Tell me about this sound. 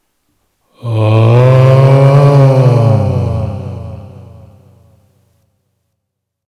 scary groan